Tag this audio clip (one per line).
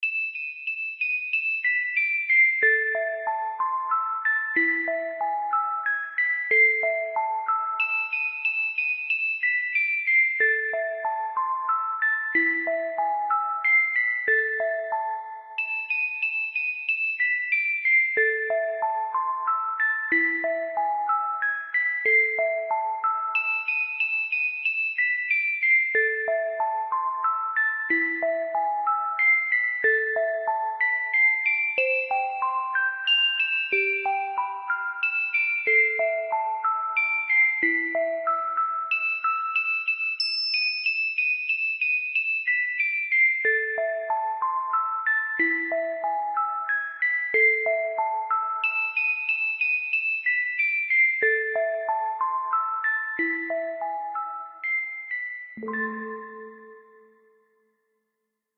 instrumental
music-box
classical